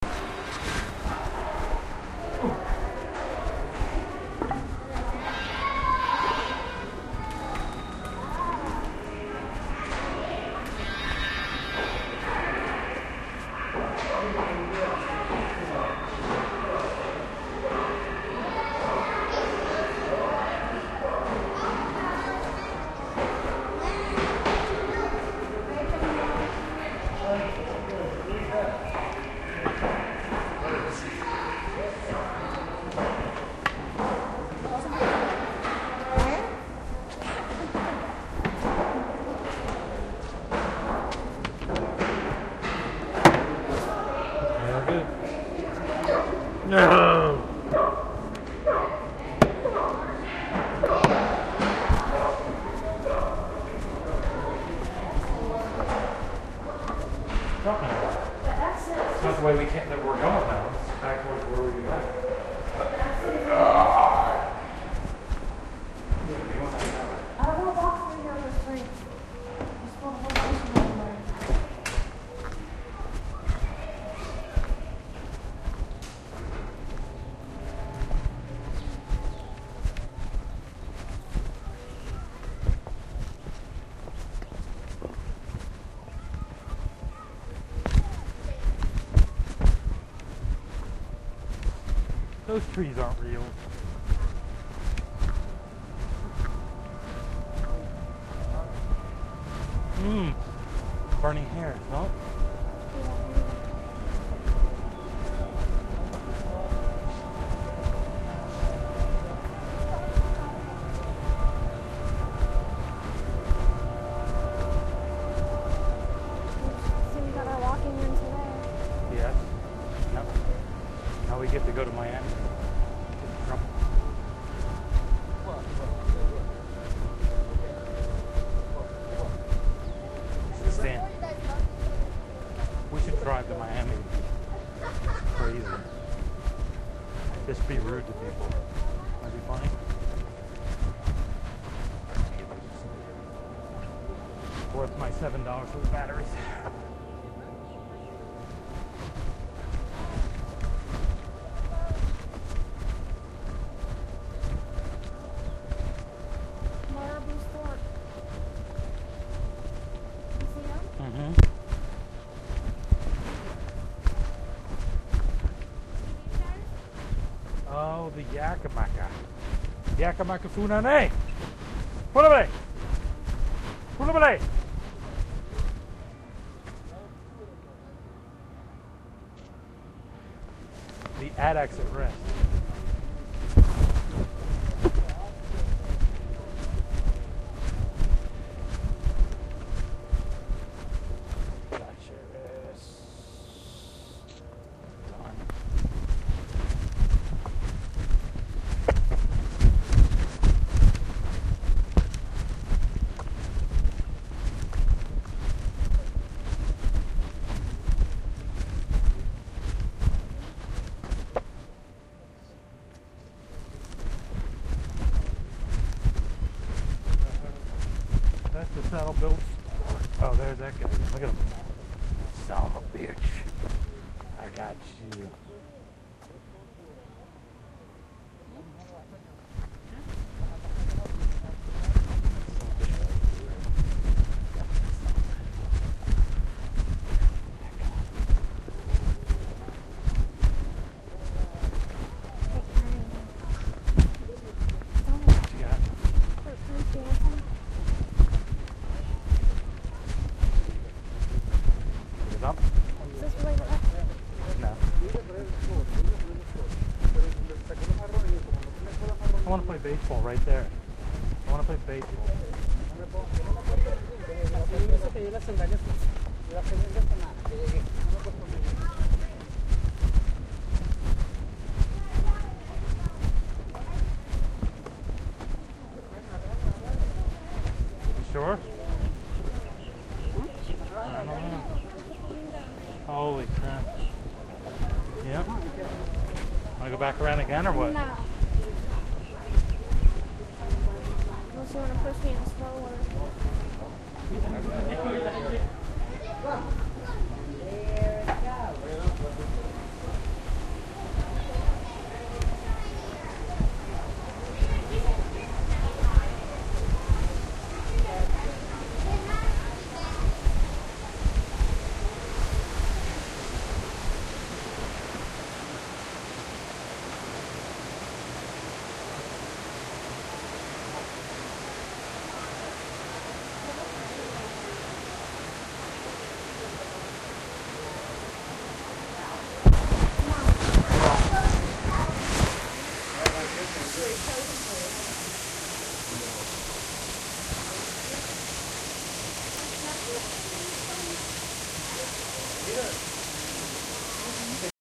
zoo accidentalwalking
Walking through the Miami Metro Zoo with Olympus DS-40 amd Sony ECMDS70P. Recorder runs in my pocket as we make our way back to the exit.
zoo, field-recording, animals